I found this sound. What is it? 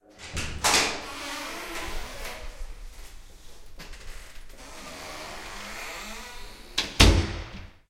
8 porta lavabo
Grabación del sonido que hace una puerta al abrir y cerrar del campus Upf-Poblenou. Grabado con Zoom H2 y editado con Audacity.
Recording of the sound of a door in Upf-Poblenou Campus. Recorded with Zoom H2 and edited with Audacity.